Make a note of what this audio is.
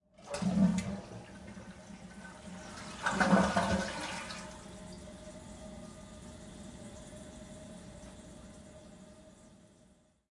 Toilet Flush
flushing of a toilet
bathroom, flush, toilet